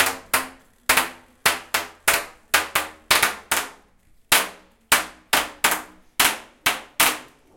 Queneau Scotch 05
dévidement d'une bande de scotch